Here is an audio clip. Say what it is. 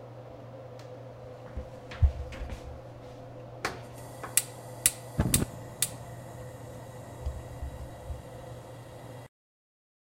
Starting Stove
Starting up my gas stove.
burner, ignition, starter